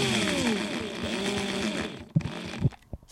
Drill slowing down and stopping
buzz, latch, machine, mechanical, whir